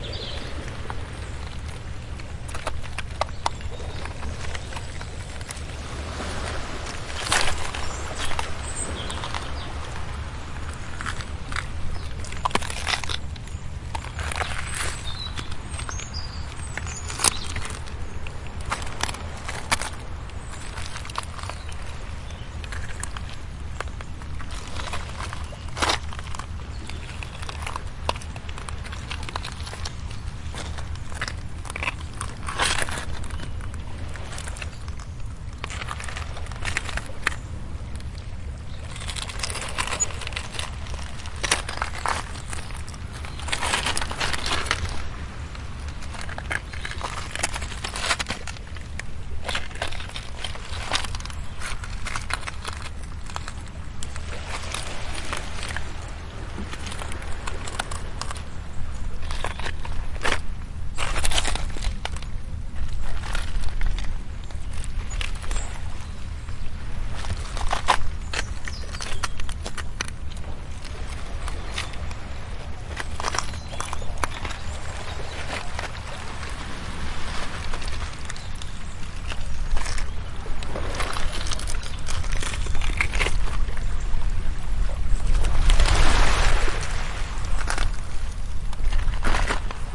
pas sur galet
Near Saint-Nazaire in France, a march on rollers in the direction of the sea. Recorded with a Zoom H4
walk, see